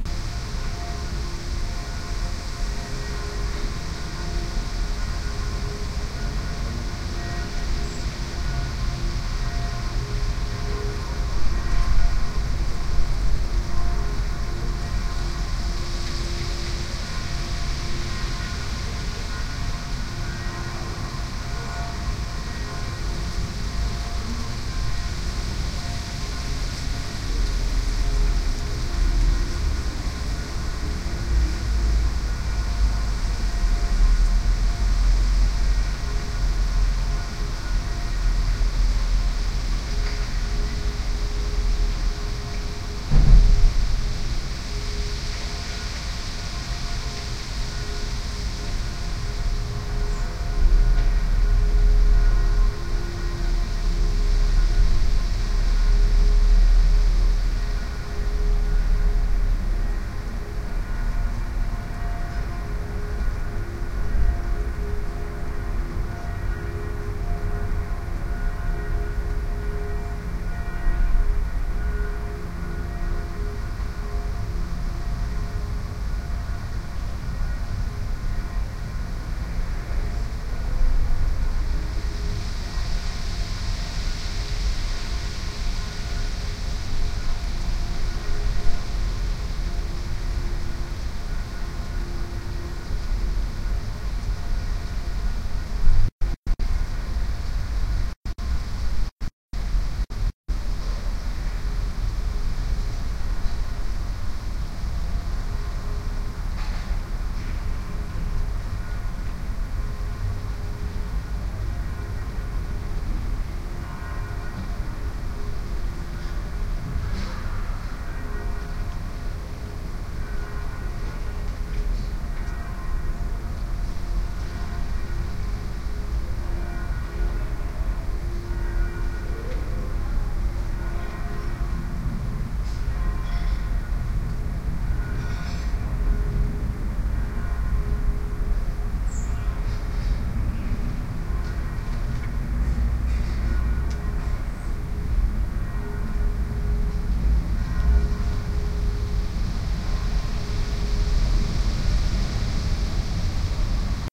Sunday afternoon in September, a backyard in the middle of town, the AEVOX IE-M stereo microphone and the Sharp Minidisk MD-DR 470H player and what do you get?: This Track!